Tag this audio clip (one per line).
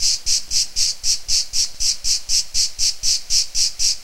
cicade
cicades
france
gard
loop
mountains
nature
summer